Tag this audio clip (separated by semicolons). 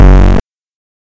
basedrum; dance; distorted; drum; electro; hard; hardcore; hit; kick; percussion; trance